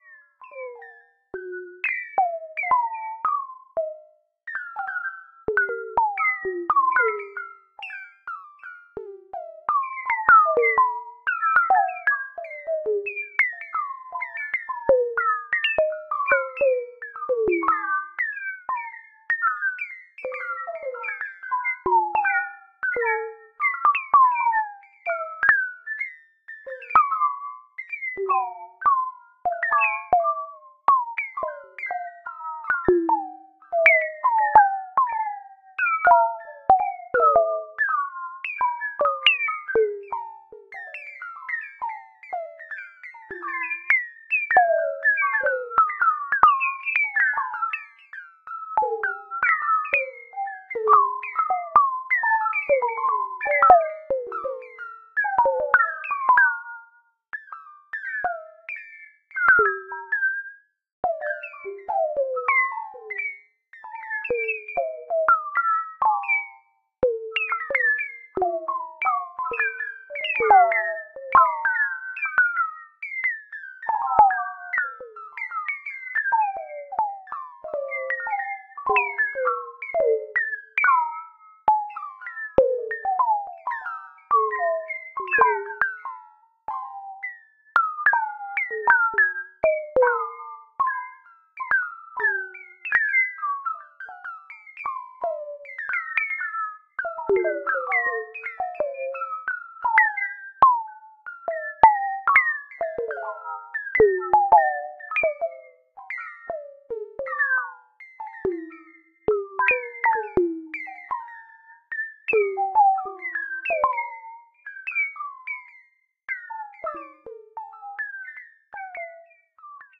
This sample is part of the "Space Machine" sample pack. 2 minutes of pure ambient deep space atmosphere. Ping pong in space.
ambient, drone, experimental, reaktor, soundscape